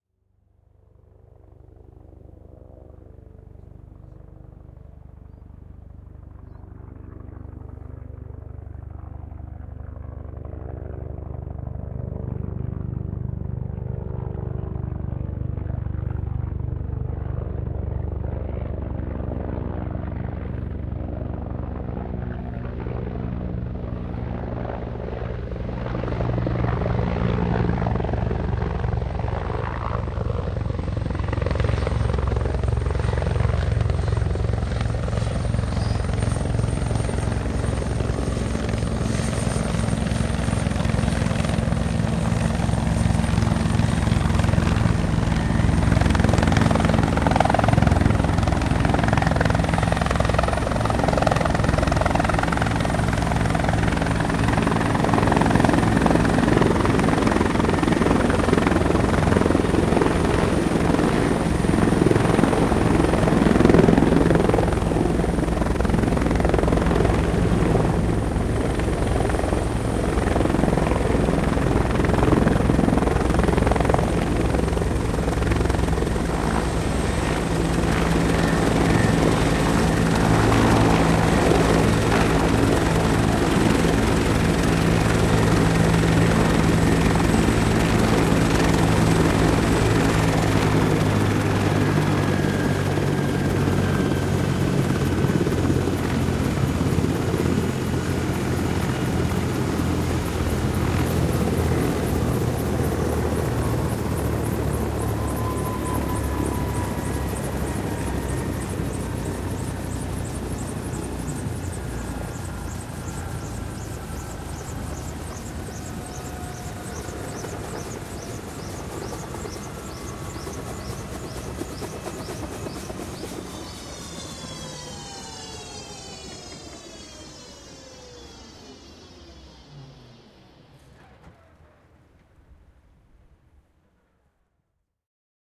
Helikopteri lähestyy ja laskeutuu / A helicopter approaching and descending, rotor, propeller blades, Agusta, a 1986 model
Agusta, vm 1986. Helikopteri lähestyy kaukaa, laskeutuu lähelle, moottorit sammuvat, potkuri viuhuu.
Paikka/Place: Suomi / Finland / Helsinki, Malmi
Aika/Date: 06.10.1992